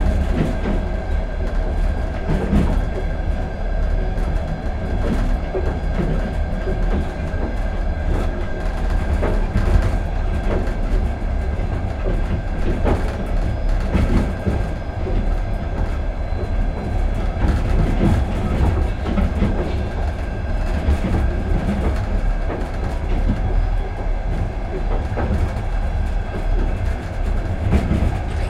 tambour passenger wagon 20130329 2
Sound in the tambour of passenger wagon.
Recorded: 29-03-2013.
noise, travel, railway